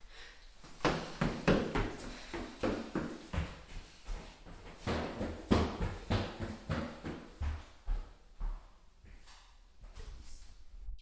Girl running up stairs and breathing
Girl running (or walking fast) up the stairs of a student apartment block fairly quickly and we can hear some breathing. Mic stays at the bottom of the stairs as she climbs from floor to floor. Bournemouth, UK